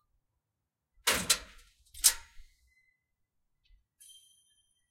GATE: This is the result of closing a gate and put the lock clang, I found interesting because the sound can be appreciated that there is a modern gate oxide can even intuit the same.
I used ZOOM H4 HANDY RECORDER with built- in microphones.
I modified the original sound and added equalized and compression.